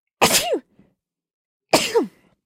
2 short female sneezes. Cute